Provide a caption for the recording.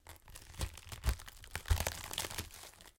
Multiple cracks 5
Some gruesome squelches, heavy impacts and random bits of foley that have been lying around.
foley, vegtables, blood, splat, gore